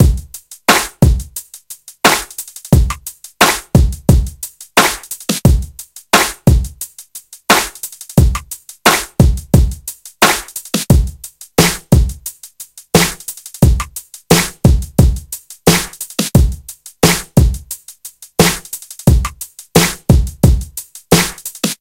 HD Loop with compressor maximiser BPM 176.2